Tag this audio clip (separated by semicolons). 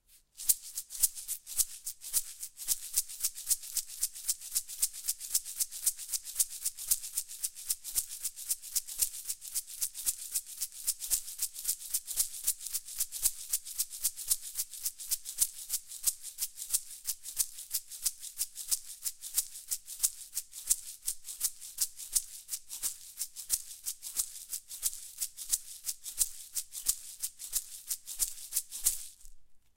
Cooking; Foley; Home; House; Household; Indoors; Kitchen; Percussion